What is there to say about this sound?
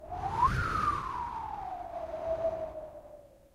Woosh5 Small 2b 135bpm
Wooshy beatbox loop
2bars @ 135bpm
loop, creative, beatbox, dare-19